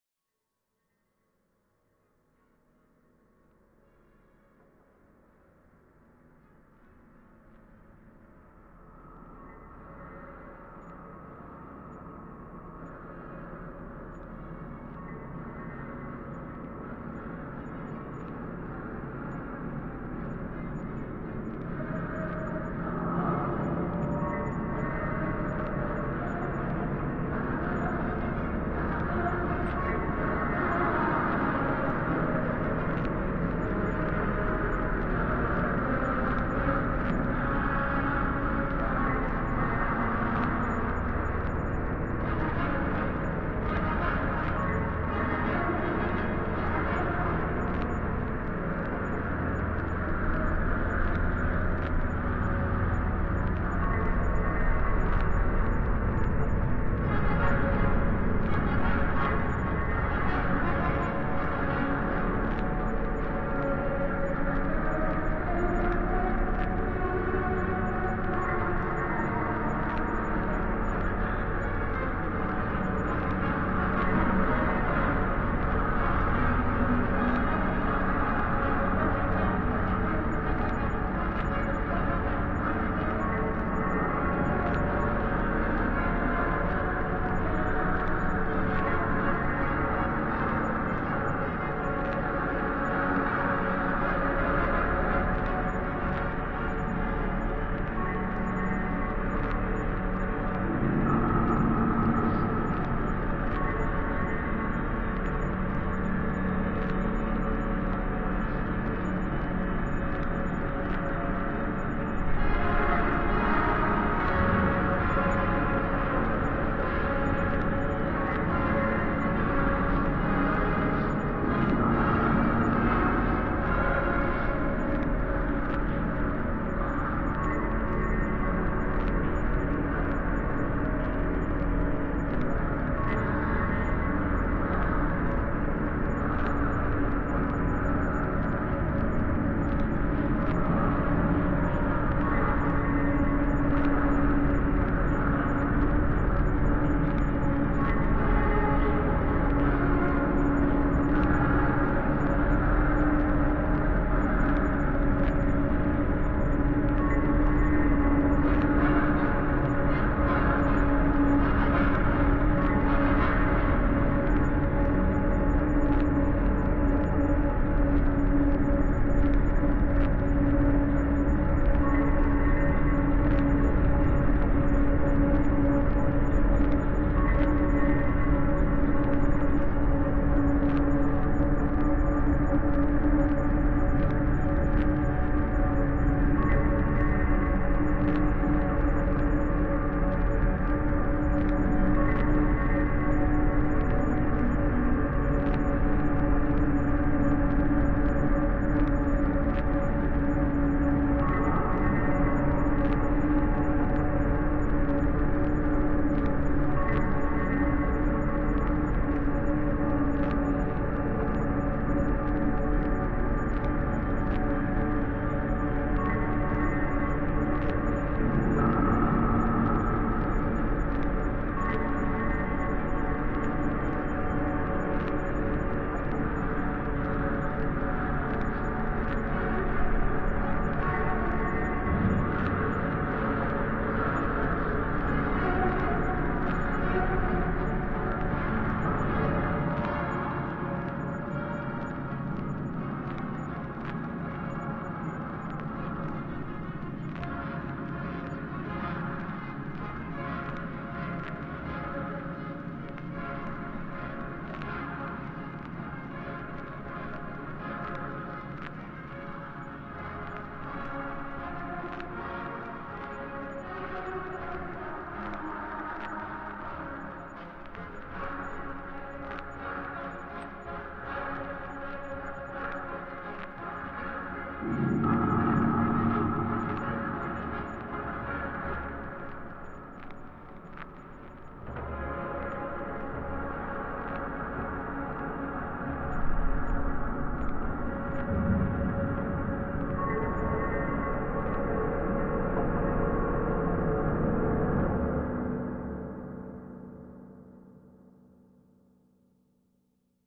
Creepy Classical Music 01
Atmosphere, Ambient, Atmospheric, Dark-Ambient, Film, Ambience, Drone, Horror, Scary, Dark, Classical, Old, Creepy, Orchestral